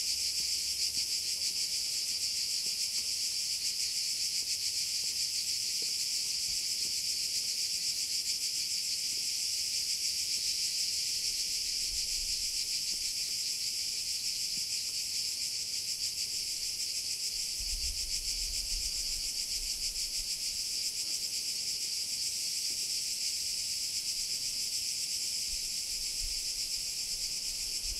Cicadas happy with themselves in Naxos island (Greece). Some wind and a fly.